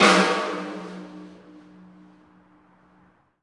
I took my snare drum into the wonderfully echoey parking garage of my building to record the reverb. Included are samples recorded from varying distances and positions. Also included are dry versions, recorded in a living room and a super-dry elevator. When used in a production, try mixing in the heavily reverbed snares against the dry ones to fit your taste. Also the reverb snares work well mixed under even unrelated percussions to add a neat ambiance. The same goes for my "Stairwell Foot Stomps" sample set. Assisted by Matt McGowin.
garage, snare